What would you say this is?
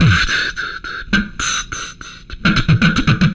Slow Echo Tube
beatboxing to simulate an echo, dynamically panned and tube driven.
tube-drive, echo, beatbox